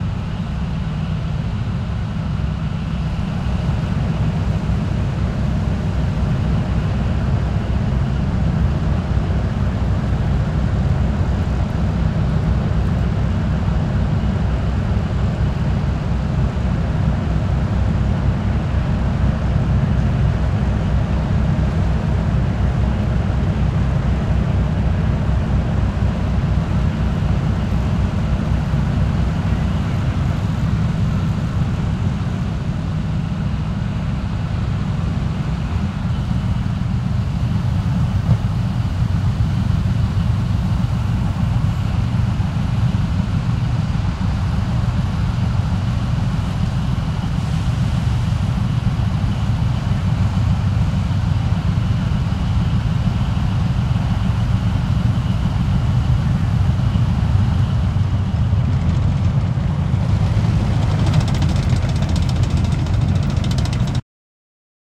WAR-TANK-ENGINE STAND BY-Heavy diesel engine-0002
Heavy trucks, tanks and other warfare recorded in Tampere, Finland in 2011.
Thanks to Into Hiltunen for recording devices.